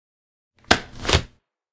gun drawn from leather holster draw